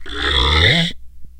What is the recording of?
low.arc.11
daxophone, friction, idiophone, instrument, wood